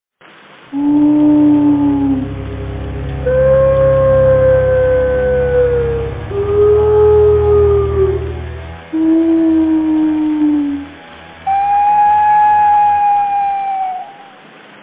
Scary Wood
This is some rain, a cello and a package of Toblerone mixed together.
Makes your skin crawl.